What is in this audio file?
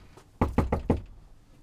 Door Knocking
door, sounds